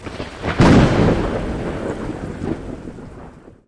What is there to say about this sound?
strike 3 sec
3 sec strike + thunder
lightning, thunder